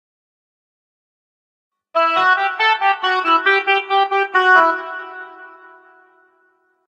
DW 140 E MEL PHRASE 2
DuB HiM Jungle onedrop rasta Rasta reggae Reggae roots Roots
DuB, HiM, Jungle, onedrop, rasta, reggae, roots